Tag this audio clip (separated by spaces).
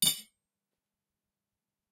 Knife Spoon Fork Cutlery